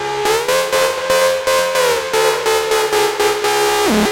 Synth Loop 9
Synth stabs from a sound design session intended for a techno release.
sample, electronic, design, sound, synth, techno, music, loop, experimental, line, stab, pack, oneshot